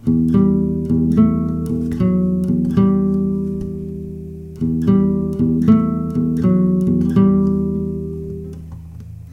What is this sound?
I just recerded a few notes, don't even ask for permissions etc..! Just use it, if you think it's pleasant or usefull ;)
To record it i used a samson c03 usb condenser mic.